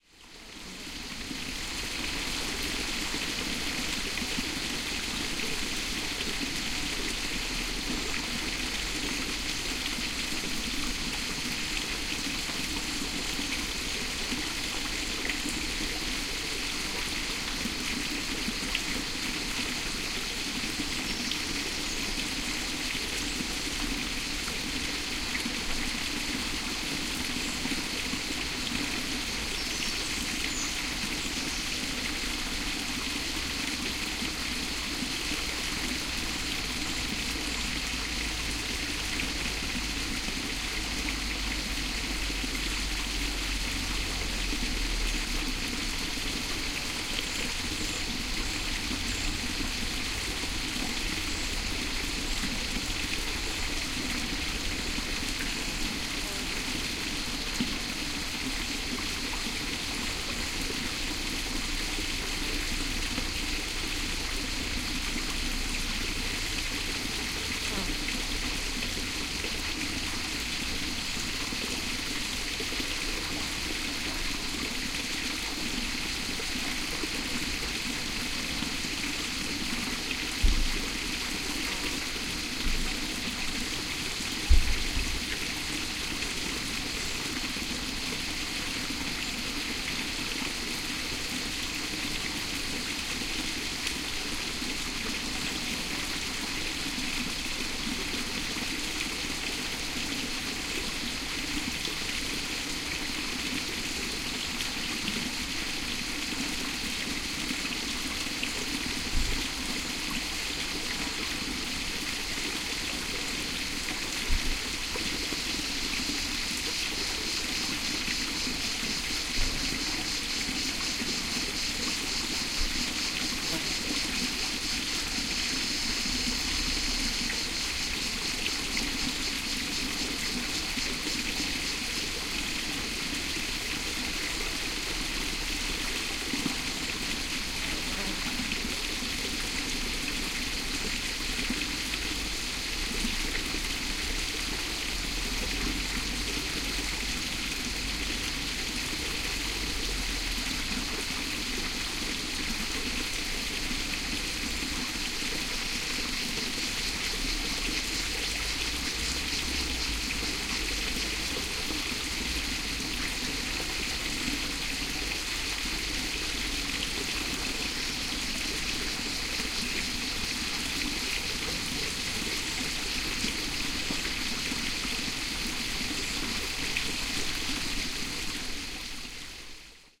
Water from a small fountain, with cycadas in background. Primo EM172 capsules into FEL Microphone Amplifier BMA2, PCM-M10 recorder. Recorded at Chilla Sanctuary, near Candeleda (Avila Province, Spain)
ambiance, ambient, cycadas, field-recording, fountain, insects, nature, summer, water